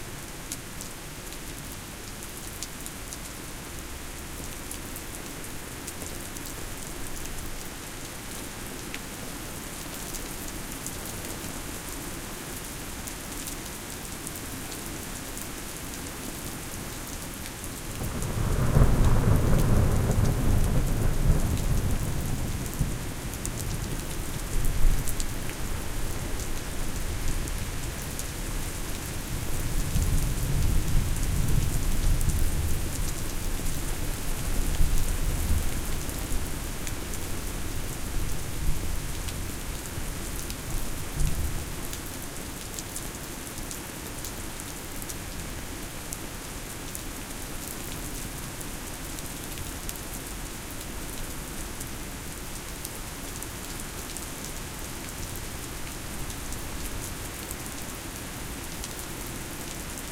EM-172 Microphone test: Thunder
A quick test of the EM-172 microphone capsules. Placement was just inside the window approx 20cm apart during a heavy rain/thunderstorm. I wanted to see how well the caps reproduced the low frequency rumble of the thunder.